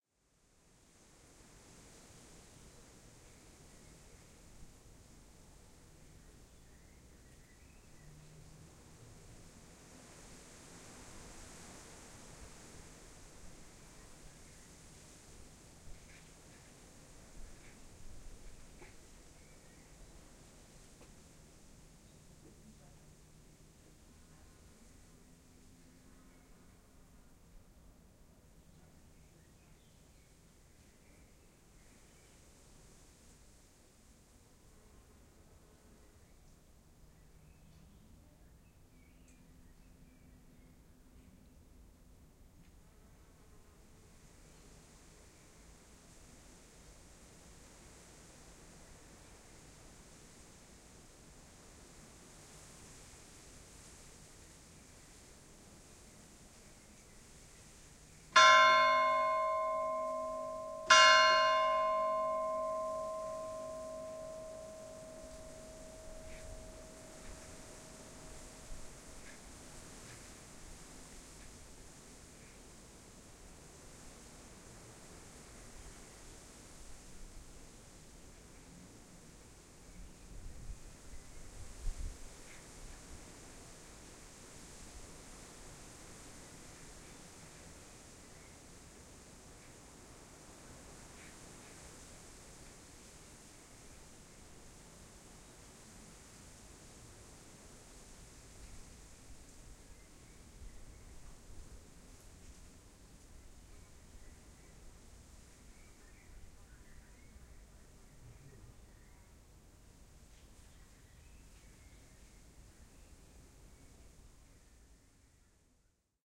230503 2414-2 FR ChurchBell
Church bell ringing two time in a very calm village, take 2 (binaural, please use headset for 3D effects).
I recorded this church bell at 2PM, during a very calm afternoon, in the old village of Peillon (South-East of France).
First, one can hear some wind in the cobbled street, a distant blackbird, and a fly buzzing. Then, at 0’58’’, the bell will ring two times.
Recorded in May 2023 with an Olympus LS-P4 and Ohrwurm 3D binaural microphones.
Fade in/out and high pass filter at 60Hz -6dB/oct applied in Audacity.
(If you want to use this sound as a mono audio file, you may have to delete one channel to avoid phase issues).
buzzing, fly, field-recording, breeze, blackbird, isolated, 2-o-clock, France, quiet, atmosphere, binaural, soundscape, silent, 2PM, Peillon, buzz, bell, calm, birds, wind, desolated, church-bell, flies, ambience, village